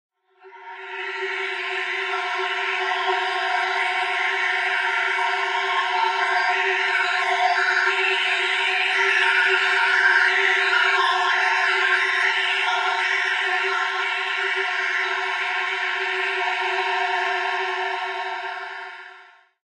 ScannerSamplerWet Long
This is a mix of sampling and resampling
Created using Ableton Live. Combination of multi-sampling, resampling, and effects.
Airy, Ambient, Dreamscape, long, reverb, sampling, Wave